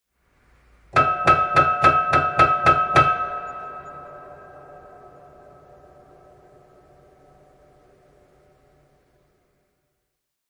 Detuned Piano Stabs 3
series of broken piano recordings
made with zoom h4n
creepy, detuned, eerie, eery, filmic, haunted, horror, out-of-tune, piano, scary, spooky, suspense, thriller, thrilling, untuned, upright-piano